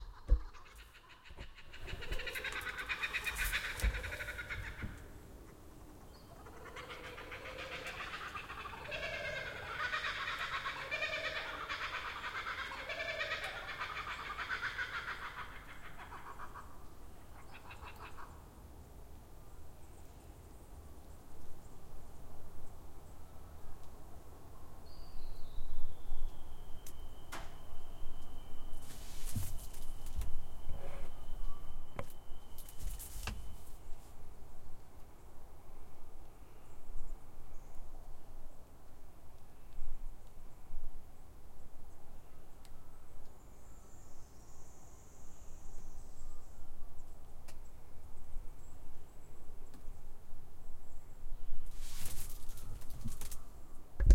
Morning Kookaburras caught on Zoom recorder